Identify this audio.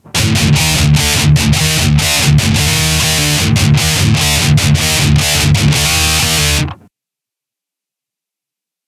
DIST GUIT 150BPM 2
Metal guitar loops none of them have been trimmed. they are all 440 A with the low E dropped to D all at 150BPM